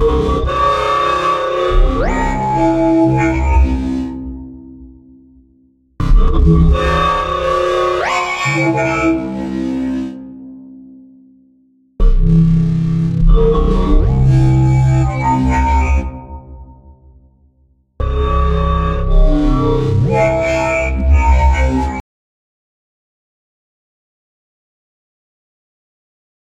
Sci-fi-003
Random scifi sounds created with Zebra2 only.
4 variations included.
robot, sci-fi, sfx, synth